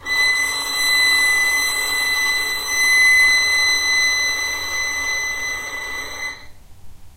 violin arco non vib B5
violin arco non vibrato
arco, non, vibrato, violin